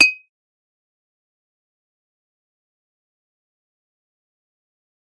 Bottle clink
SFX - Tapping a Black Label Beer (Kort) bottle against a big rock, recorded outside with a Zoom H6.